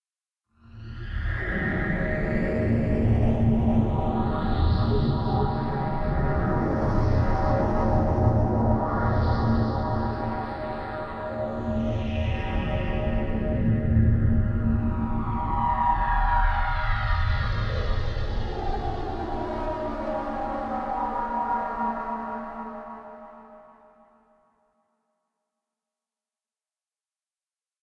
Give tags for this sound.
ambience ambient atmosphere background bridge dark deep drive drone effect electronic emergency energy engine future futuristic fx hover impulsion machine noise pad Room rumble sci-fi sound-design soundscape space spaceship starship

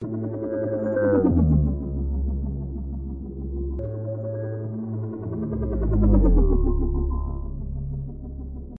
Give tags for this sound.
alien computing design effect fiction future fx sci-fi sound soundesign space space-ship spaceship synth UFO

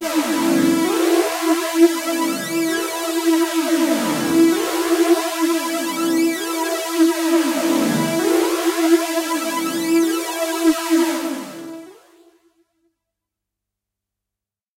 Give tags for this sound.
electronic hard lead multi-sample phaser synth waldorf

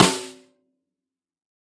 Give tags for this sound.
velocity snare 1-shot multisample drum

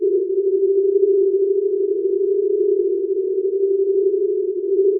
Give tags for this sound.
synth whistle multisample

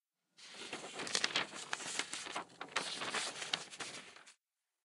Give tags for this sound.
paper rustling rustle paper-page rustling-paper page

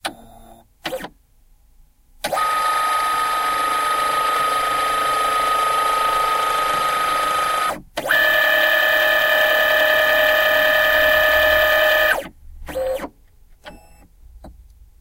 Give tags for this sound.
printer pc copy office computer scaner print machine printing paper scan

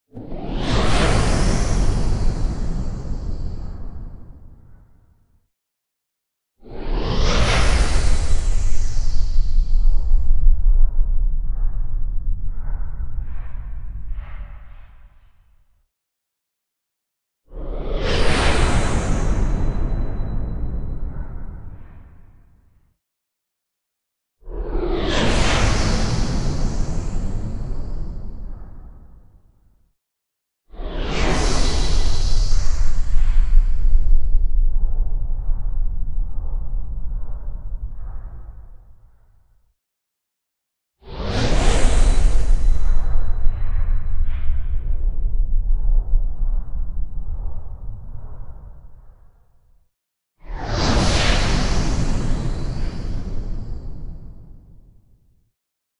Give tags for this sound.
whoosh transitions sound design

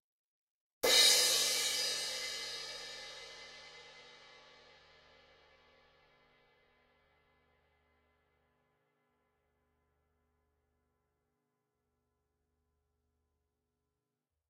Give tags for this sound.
Zildjian Dark Hit

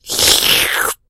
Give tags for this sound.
eating slurp slurping

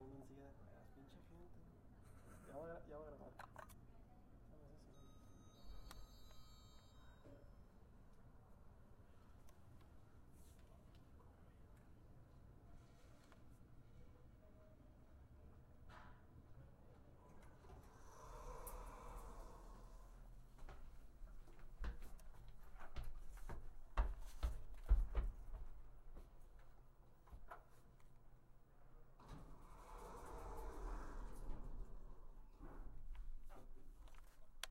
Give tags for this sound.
background-sound soundscape background ambient

